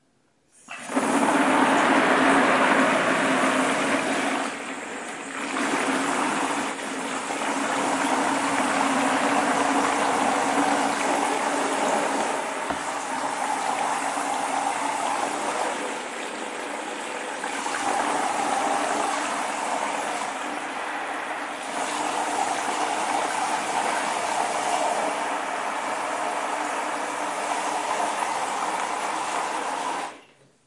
water filling
filling plastic bucket with water